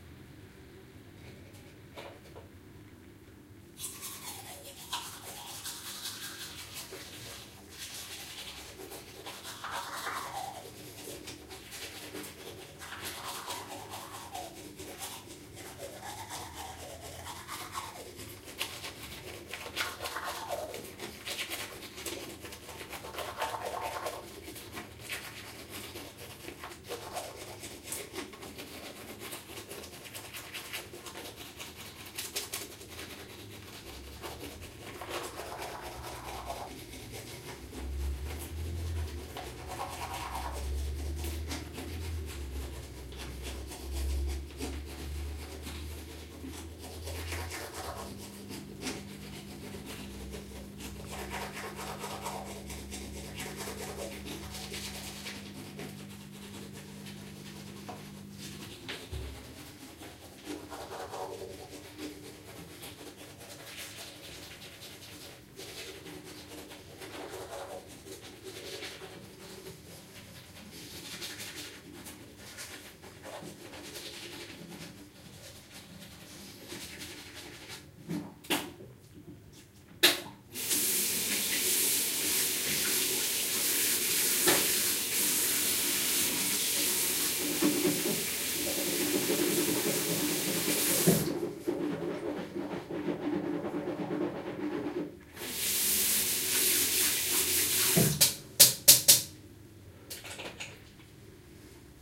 Tooth brush recorded with a ZoomH2N, Paris, May 2018.
live, Tooth, recording, Brush, ZoomH2N, Ambiance